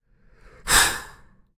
Toro Soplando
this is a bull